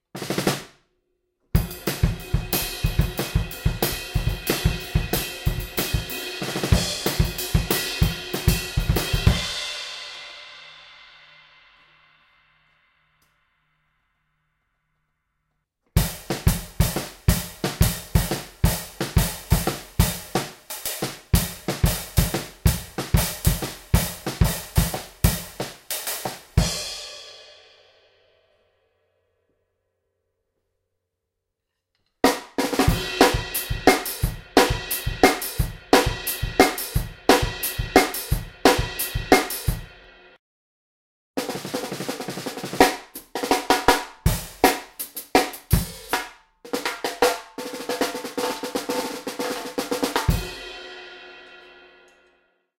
HipHop kit - upbeats 10 - small+big snare - trash ride
Some upbeat drum beats and fills played my hip hop drum kit:
18" Tamburo kick
12x7" Mapex snare
14x6" Gretsch snare (fat)
14" old Zildjian New Beat hi hats w tambourine on top
18"+20" rides on top of each other for trashy effect
21" Zildjian K Custom Special Dry Ride
14" Sabian Encore Crash
18" Zildjian A Custom EFX Crash
hip, hop, kick, kit, beats, hihat, crash, snare, upbeat, drums